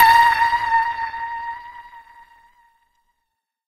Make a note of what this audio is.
SONAR PING PONG Bb
The ping-pong ball sample was then manipulated and stretched in Melodyne giving a sound not dissimilar to a submarine's SONAR or ASDIC "ping". Final editing and interpolation of some notes was carried out in Cool Edit Pro.
asdic, audio, ball, game, melodyne, millennia, notes, ping, pong, preamp, sample, technica, tuned